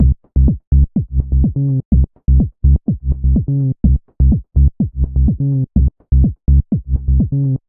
Drums loop Massive 120BPM-03
120bpm, drums, loop